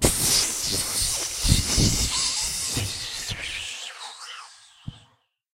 FX air escape
The sound of air escaping from a punctured inflatable.